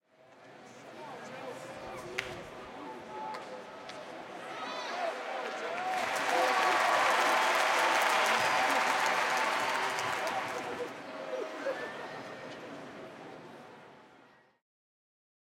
WALLA Ballpark Applause Short 02
This was recorded at the Rangers Ballpark in Arlington on the ZOOM H2.
applause, ballpark, baseball, cheering, clapping, crowd, field-recording, sports, walla